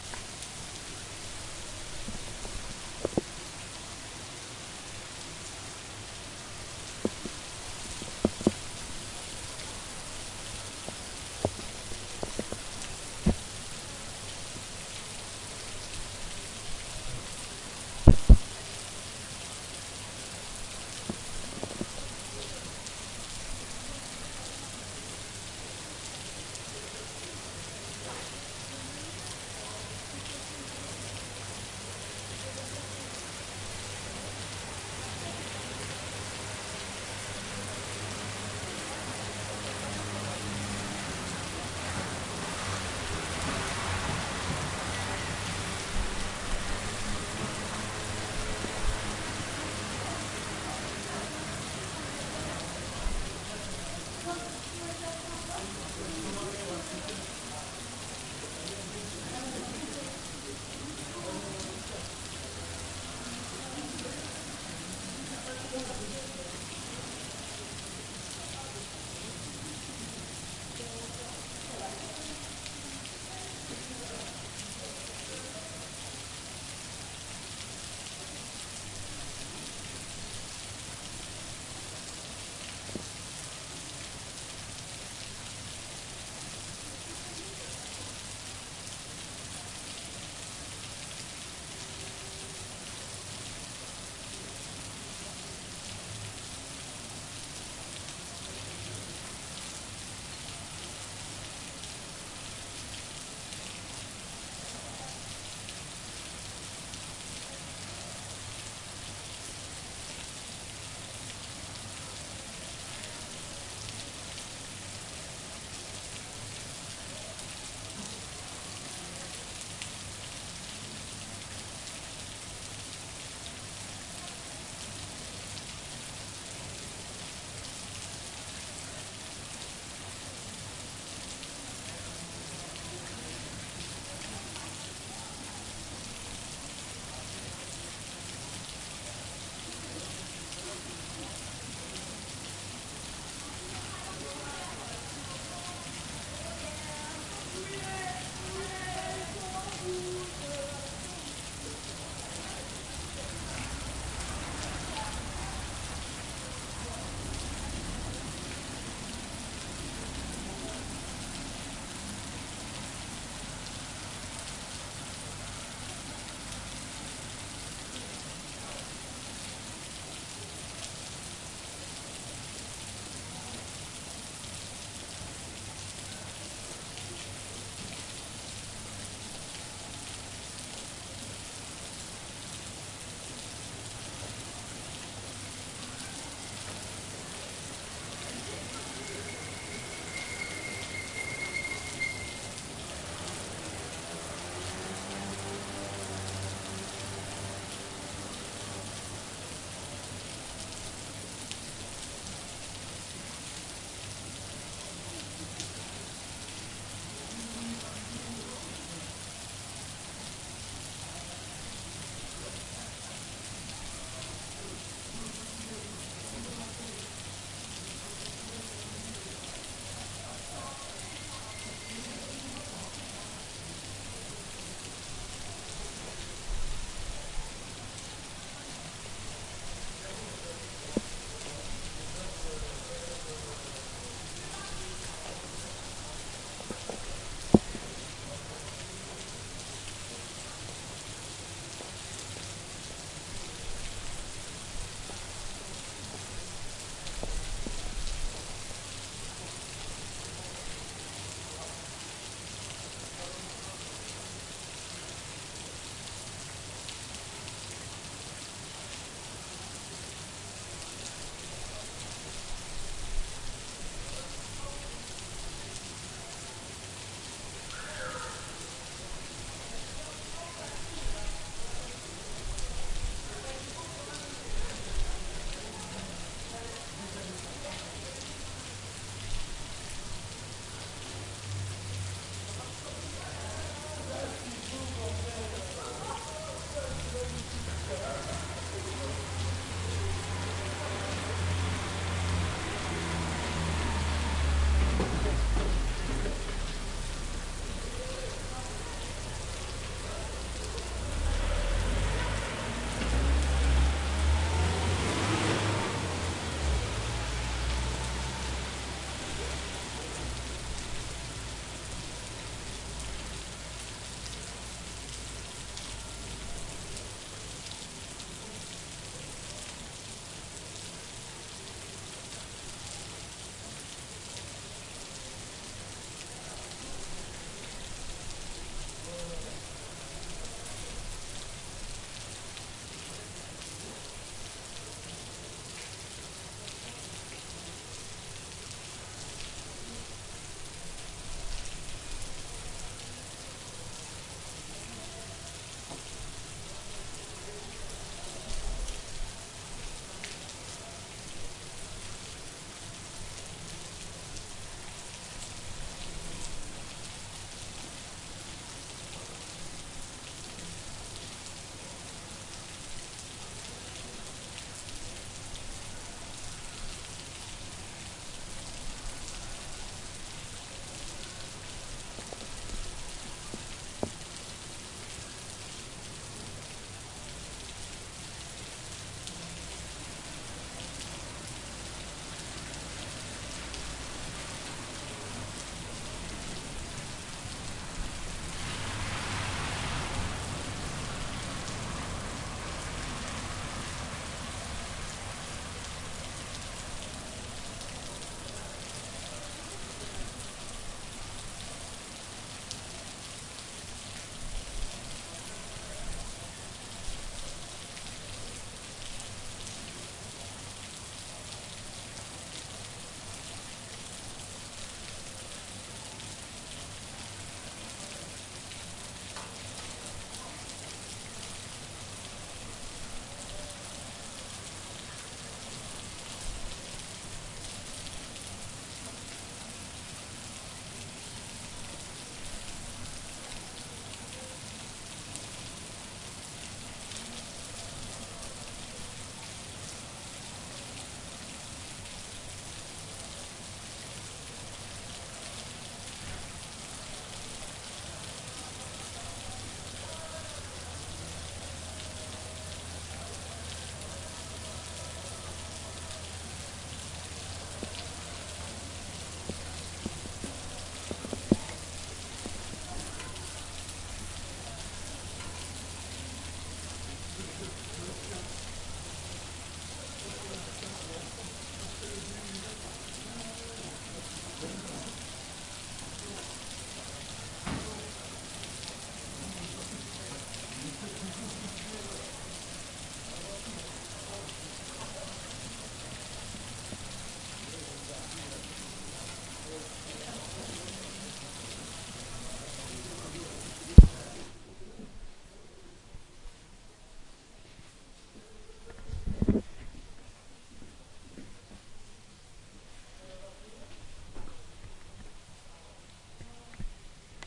son pluie
rain sound recorded in toulouse, france, in 2006 i guess. i maybe used a minidisc with a sony stereo ms microphone.
pluie, rain, water